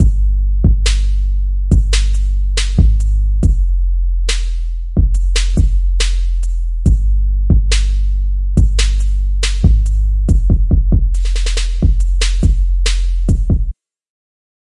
chilling laid back hiphop beat:)